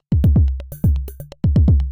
Rhythmmakerloop 125 bpm-57
This is a pure electro drumloop at 125 bpm
and 1 measure 4/4 long. A more minimal variation of loop 53 with the
same name with some added electronic toms. It is part of the
"Rhythmmaker pack 125 bpm" sample pack and was created using the Rhythmmaker ensemble within Native Instruments Reaktor. Mastering (EQ, Stereo Enhancer, Multi-Band expand/compress/limit, dither, fades at start and/or end) done within Wavelab.
electro, drumloop, 125-bpm